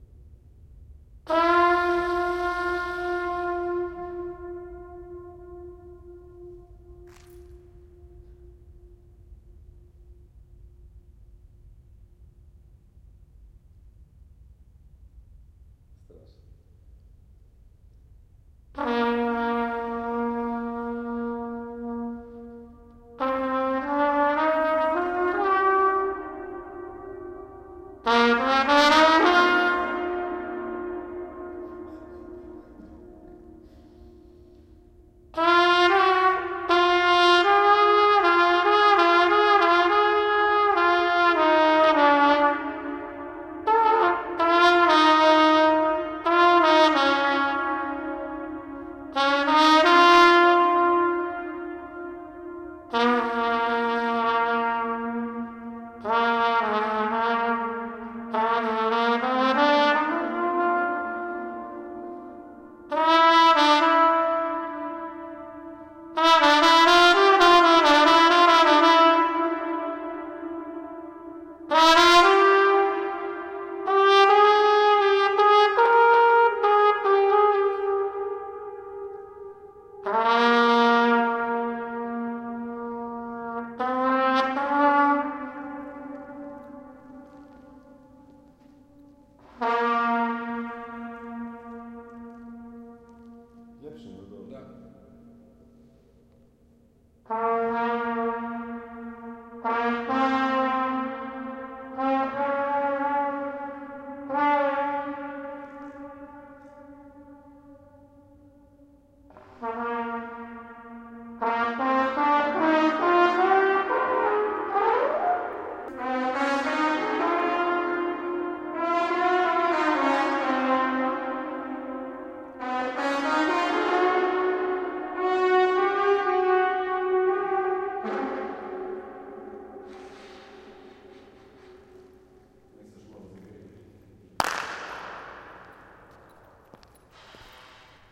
Trumpet UnfinishedCarTunel
my friend play trumpet in unfinished few km road tunnel